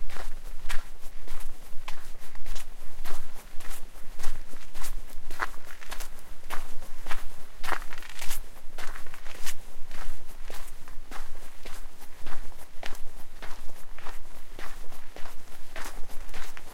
footsteps (snow, sand) 01
walking in snow and sand